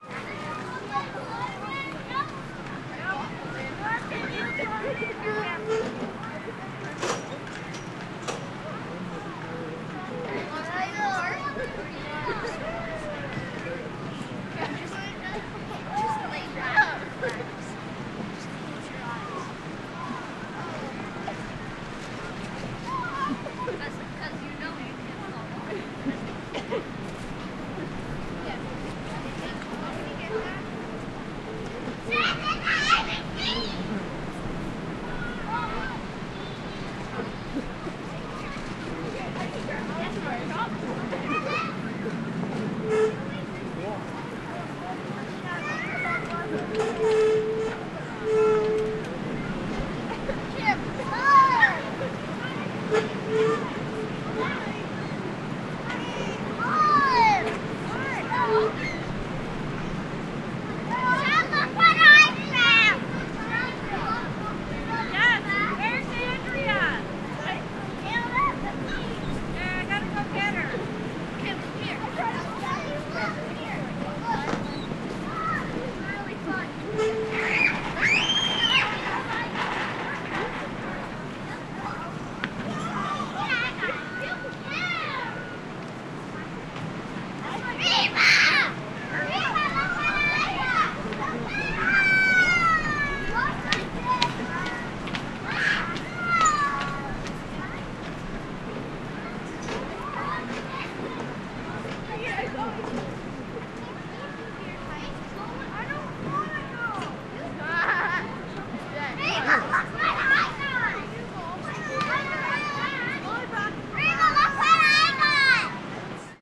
Playground noises
Play-ground, kid, playing, children, playground, school, kids, swing, child, kindergarten, yelling